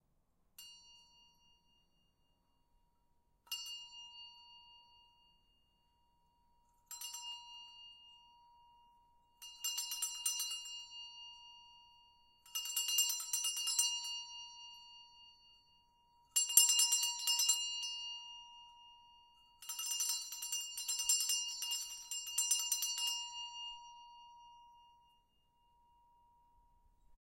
Medium sized hand bell at diffrent tones
medium size Hand bell being rung
bell, chime, clang, ding, metal, metallic, owi, ring, ringing, ting